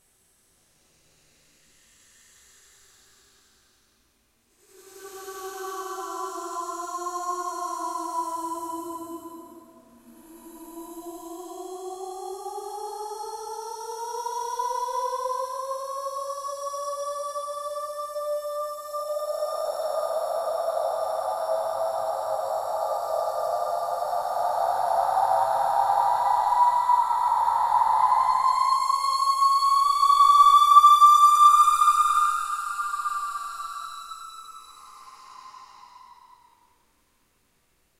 Futuristic Space Sound
A futuristic terror space sound
Sound, Space, Future, Terror